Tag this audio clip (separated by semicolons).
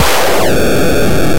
arcade; chiptune; decimated; vgm; video-game